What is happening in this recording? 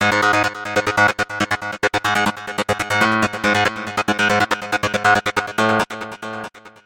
TR LOOP 01
goa, loop, psy, psy-trance, psytrance, trance